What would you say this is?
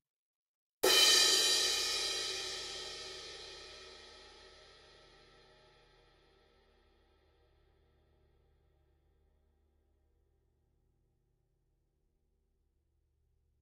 Paiste 2002 19" Crash Softer Hit - 2009 Year Cymbal
Paiste 2002 19" Crash Softer Hit